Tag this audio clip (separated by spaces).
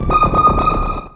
multisample one-shot synth